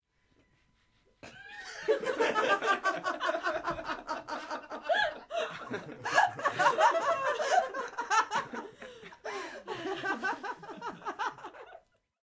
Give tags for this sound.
folio; fun; laughing; laughter

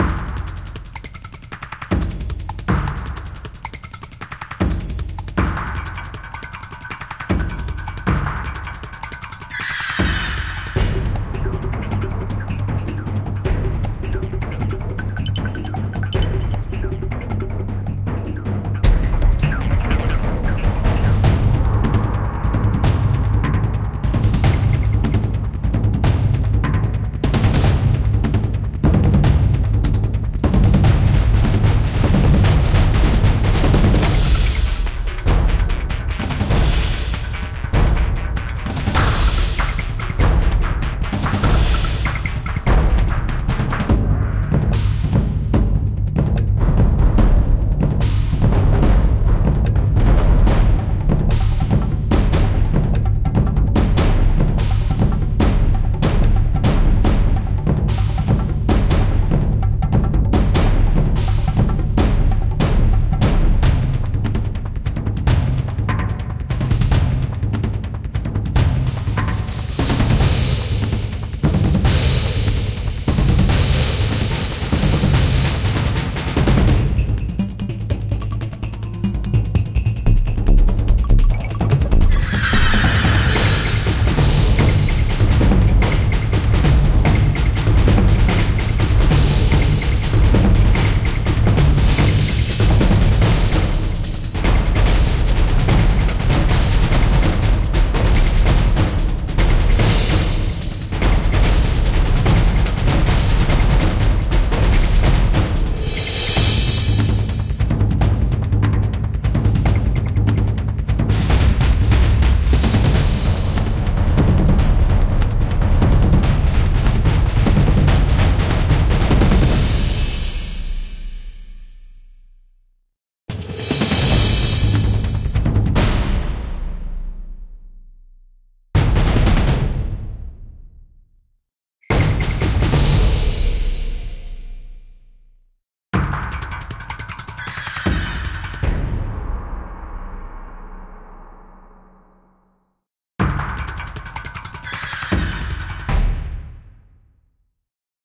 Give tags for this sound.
fast; Field-recording; chase; speed

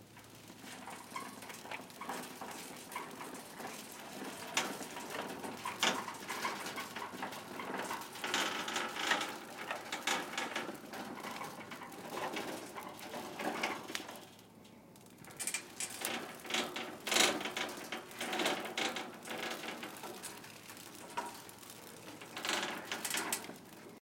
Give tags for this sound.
cart; grocery; shopping; sqeaking; wheels

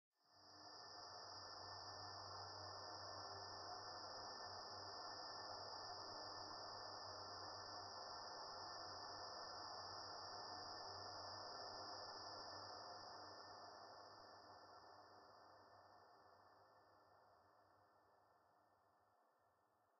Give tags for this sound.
Sleep; Night; Dark

